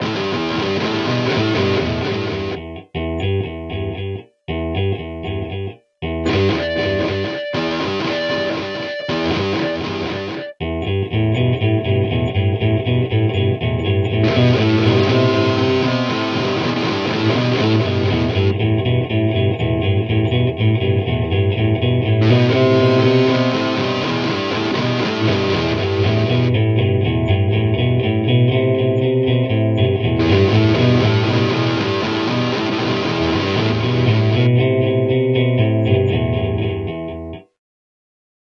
Two instances of Stereo Trance Gate where used in parallel as volume envelopes, effectively panning the signal between two different amps. The result is a little riff where the tone of the guitar changes during the riff.